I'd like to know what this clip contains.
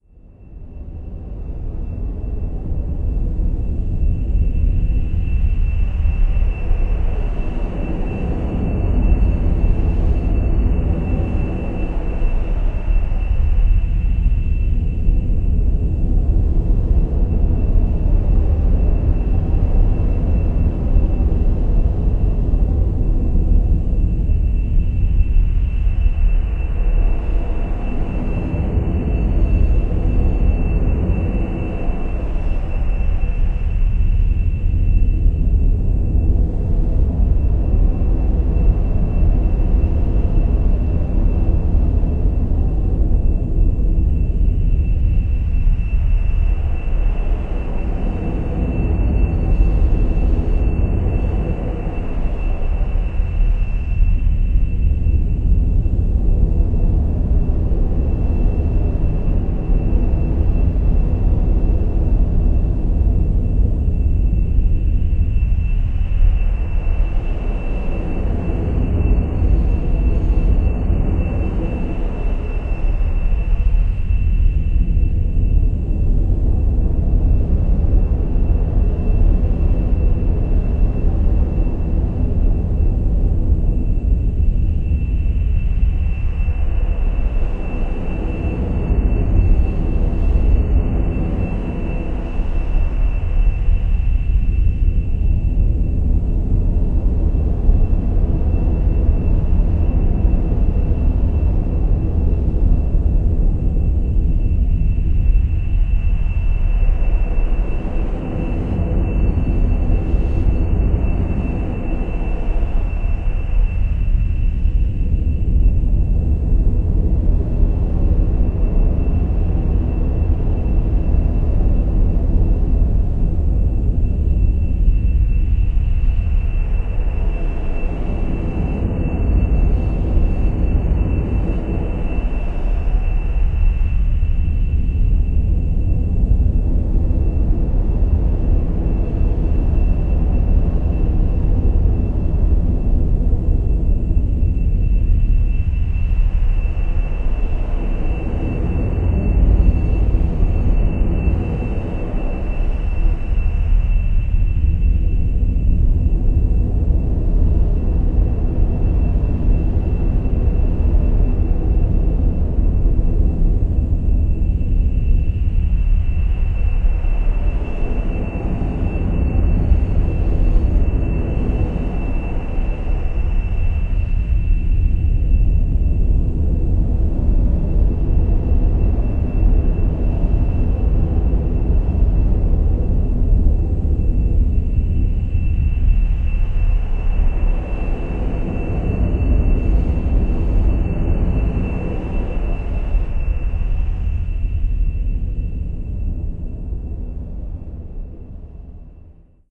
TURNTABLE BROWN NOISE mixed edited in AUDACITY
USED FX PHASER and PAULSTRETCH and You Wa Shock !
ENJOY !
Influanced by:
Lou Reed
Vernian Process
Uz jsme doma
Throbbing Gristle
Sunn O)))
Scott Walker
Recoil (band)
Moondog
Jonathan Davis and the SFA
Hella (band)
Brian Eno
Einstürzende Neubauten
Coil
John Cale
Boredoms
Björk
pink floyd
Arcturus
Autopsia
Karlheinz Stockhausen
Steve Reich
Sun Ra
Max Neuhaus
Mike Oldfield
Philip Glass
Aaron Funk
Morton Feldman
Aphex Twin
Brian Eno
Claude Debussy
Ivor Cutler
John Cage
Lustmord
kraftwerk
The Art of Noise
The Future Sound of London ( FSOL )
ZOVIET FRANCE
Musique Concrete
Tangerin Dream
Yello
DRONE, NOISESCAPE, SOUNDSCAPE